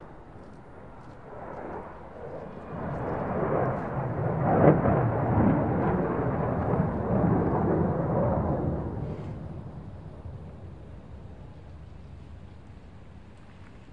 Jet SU rec-1
Russian military jet SU flying.
Date: 2016.03.19
Recorder: TASCAM DR-40
jet, airpane, russian-military-jet